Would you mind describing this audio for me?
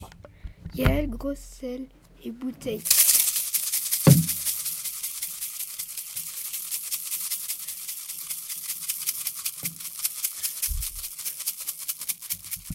mysounds-Yael-bouteille gros sel
bottle with salt
france, saint-guinoux